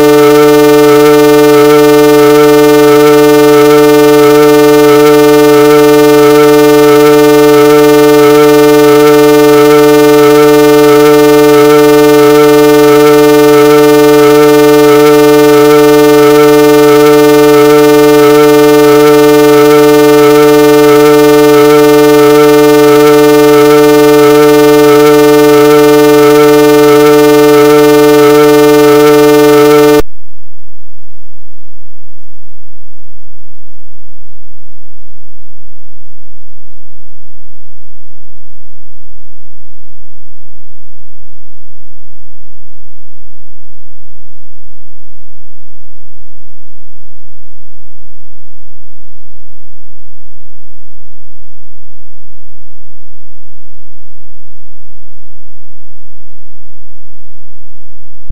It's a little experience with Audacity, I always listen electronic music and i had try to make different sons with the software, i generated some sons with different frequency and shape of wave : 350 Hz, Dents de scie,
1, experience, number